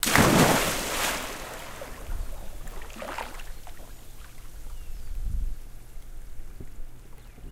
POOL CANONBALL DIVE 2
-Canon-ball into backyard pool
swimming, pool, dive, canonball, diving, waves, canon, swim, splash, water, canon-ball, splashing